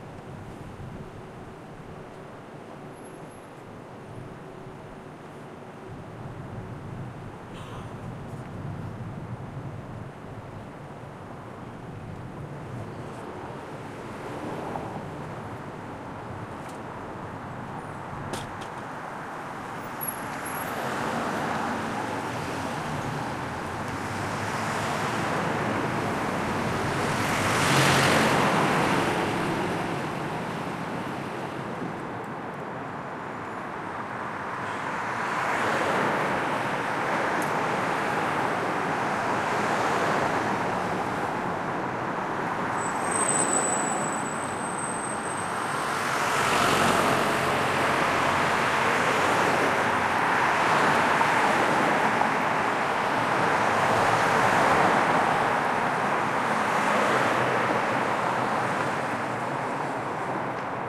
Mid-range field recording taken on Varick St. in Manhattan, New York, near the corner of King St.
The recording was made on a Saturday morning at about 6 AM and features flowing traffic with diverse cars, trucks and buses. Not many people are about at this hour, making for a nice, neutral backdrop for urban scenes.
Recording conducted with a Zoom H2, mics set to 90° dispersion.
ambience, ambient, bus, busy, car, cars, city, close-range, field-recording, morning, New-York, noise, noisy, NY, street, traffic, truck